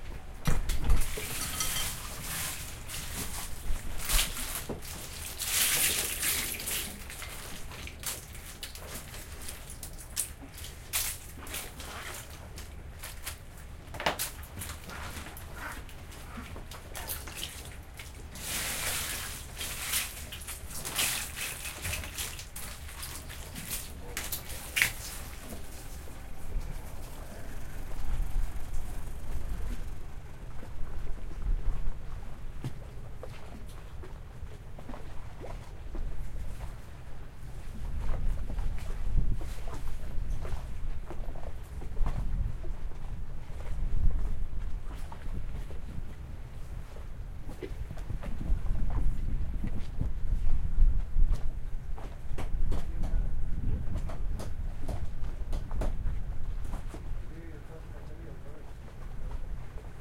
Picking and sorting the clam at sea
Sound of shellfishers picking out clams and other seafood in a location near the port.
almeja, sea, shellfish, marisqueo, mar, trabajo, clam, work